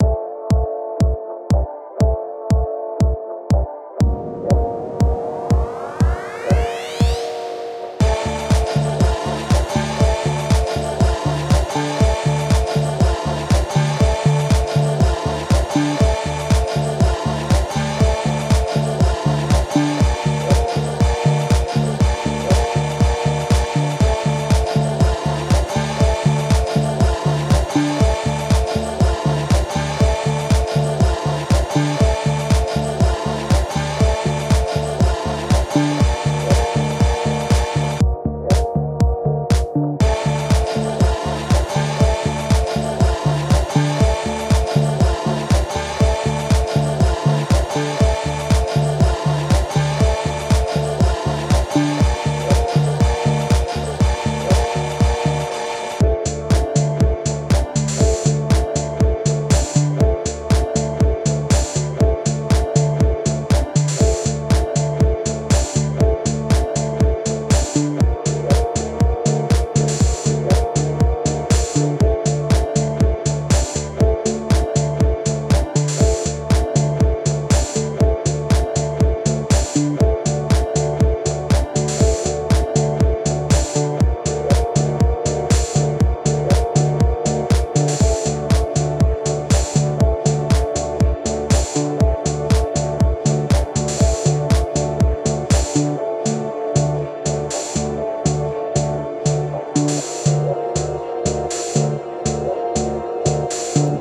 Chorus music - Techno loop.
bass, Chorus, dance, drum-loop, electronic, groovy, kick, loop, music, original, percs, percussion-loop, pipe, quantized, rhythmic, sample, synth, Techno, techno-house, track